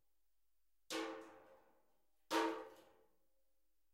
Hitting Keg with Bat.
Bat, Hitting, Keg